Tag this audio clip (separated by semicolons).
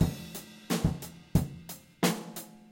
drum; loop